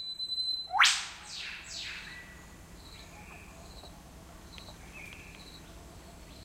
SDR 0011 whip bird1
Whip bird in the forest. Eastern Australia
birds, field-recording, forest, nature, whip-bird